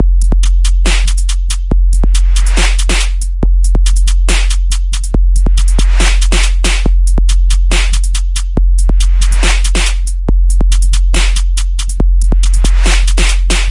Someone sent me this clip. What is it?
Dusbteploop 140BPM [2] 6
drum, loop, drumstep, snare, hi, kick, shaker, dubstep, hat